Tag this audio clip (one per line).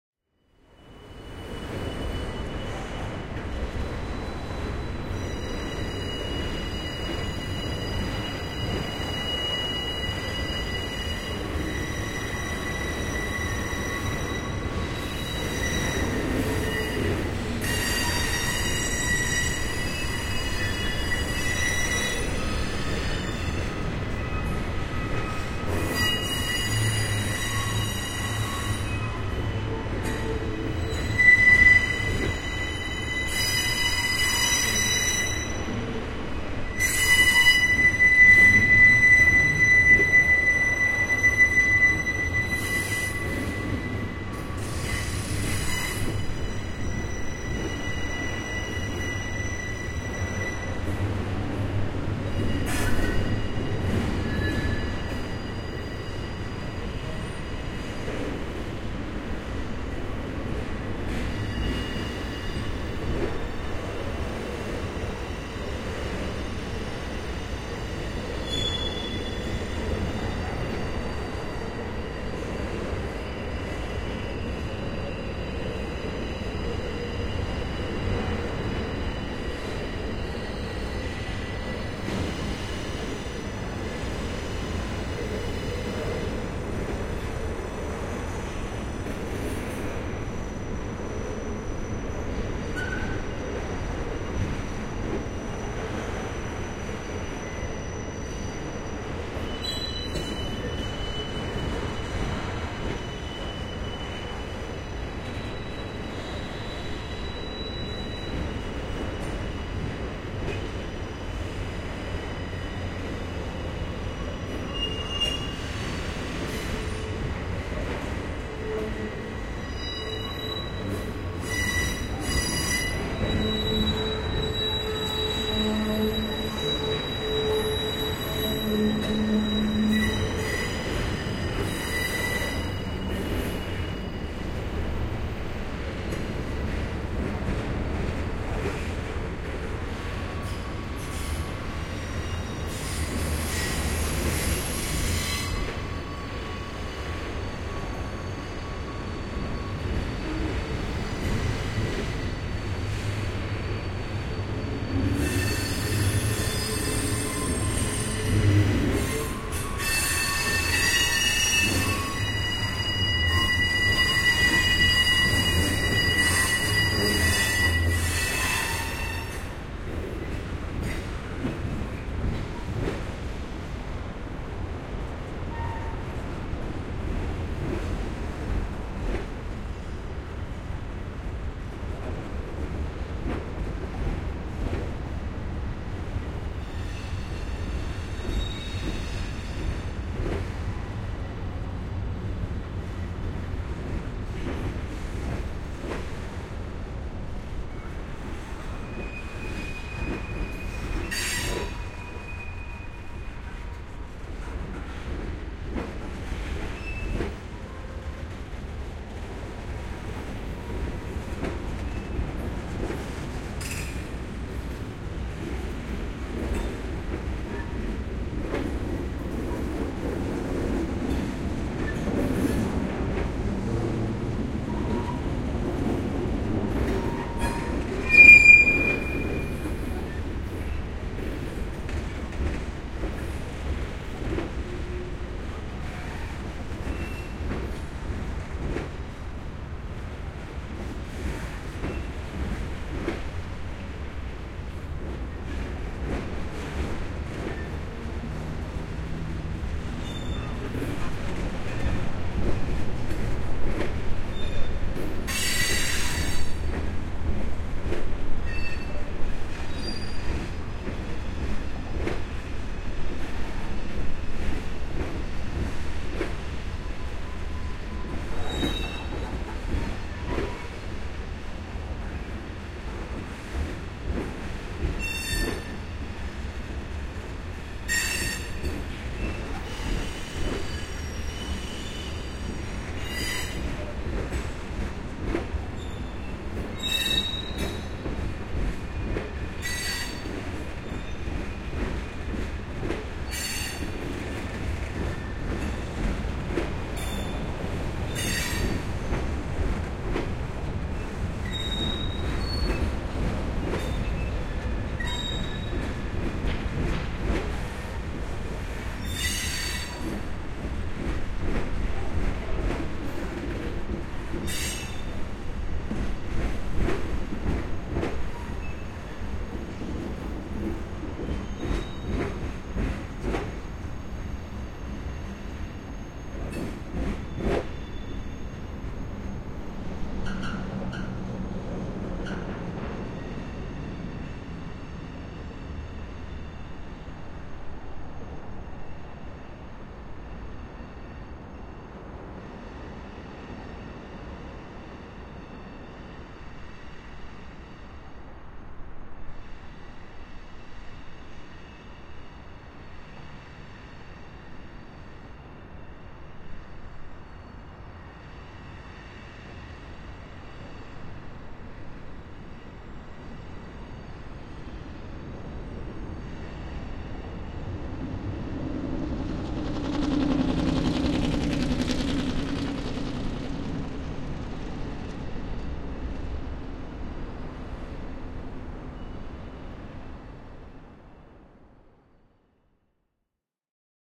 locomotive
train
metallic
metal
cityscape
field-recording